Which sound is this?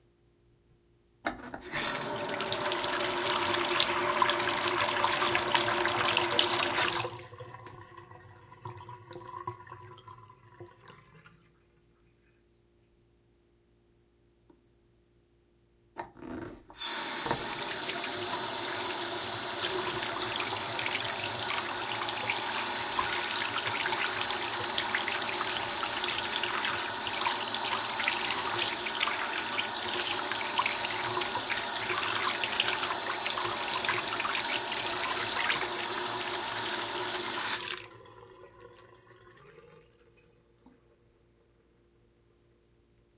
Porcelain bathroom sink running, washing hands, then draining 2x